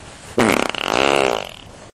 a decent fart
weird, flatulence, poot, gas, noise, flatulation, fart, explosion